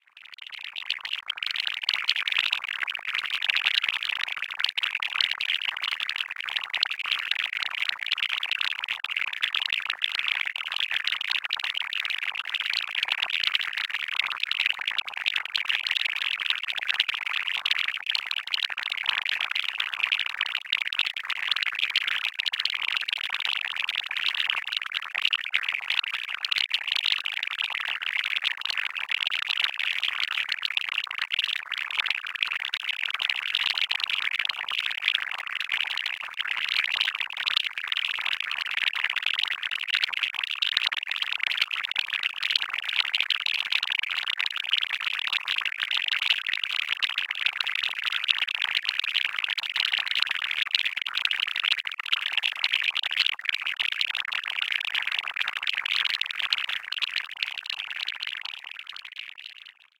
This sample is part of the "Space Drone 3" sample pack. 1minute of pure ambient space drone. Space droplets.